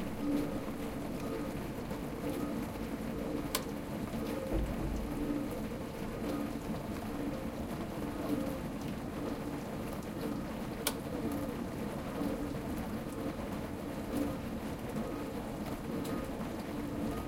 dish washer rinsing
Dish washer in the rinsing phase.
Edirol R-1
dish-washer, field-recording, machines